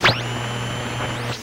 Sweeping the shortwave dial -- noise & tones filtered by changes in radio frequency.